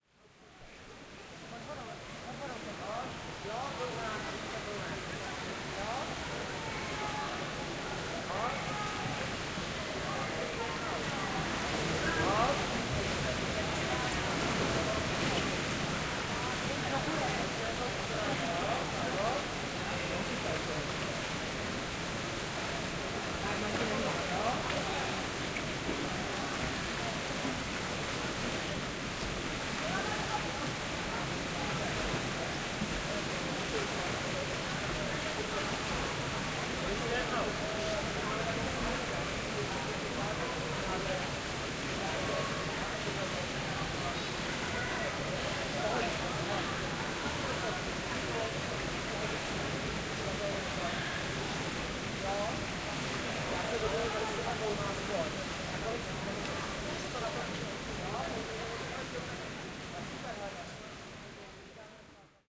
15 ambient swimming pool
Sounds from swimming pool
CZ, Czech, Panska, Pool